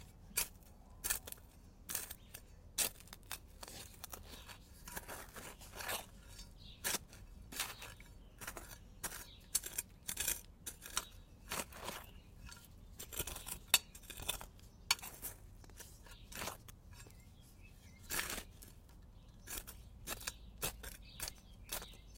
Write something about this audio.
Digging Sand
This sound was created using a small garden shovel.